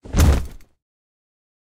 bodyfall thump over motorbike seat Parking Space
seat, thump, motorcycle, bodyfall